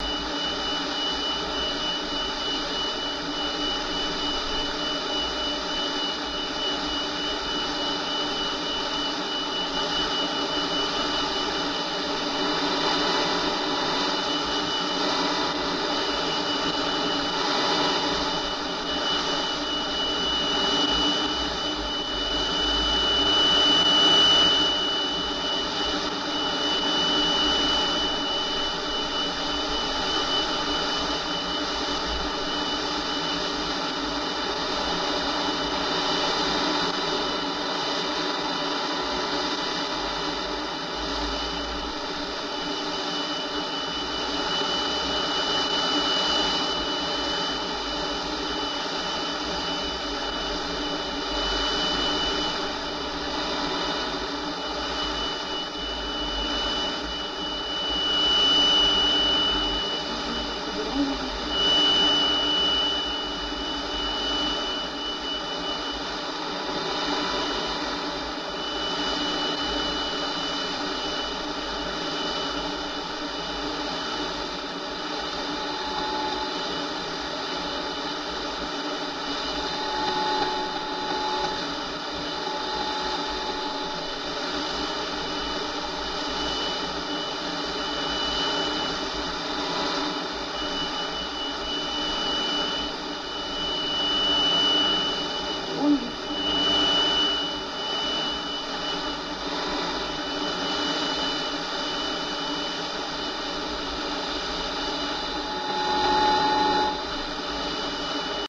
radio receive noise
short wave band receiving noise, recorded true an old solid state radio, ITT made late '70.
radio-noise, AM, tuning, movie-sound, effect, ambient